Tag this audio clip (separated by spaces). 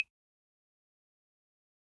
africa instrument percussion phone